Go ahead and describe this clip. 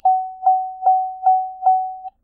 Warning chime inside car.
car, chime, ding, noise, warning